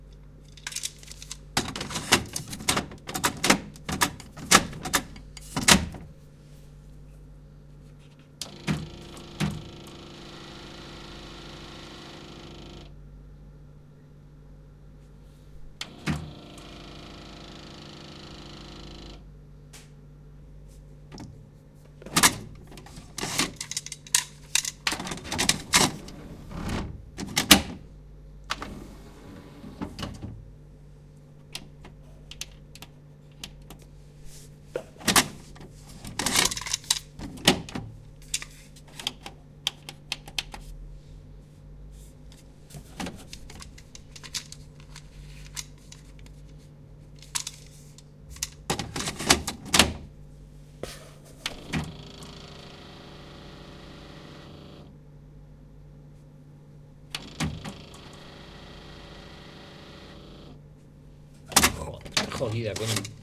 noises made inserting / ejecting / rewinding a mini-cassette. At the end you can hear me cursing (in Spanish) because the old deck does not work. Shure WL183 into Fel preamp and Edirtol R09 recorder